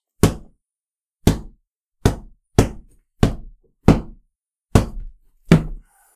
Series of Punches
A series of consecutive punches that land on their target. Recorded on MAONO AU-A04TC; created by repeatedly punching a martial arts training pad.
Attack, Battle, Original, Punch, Street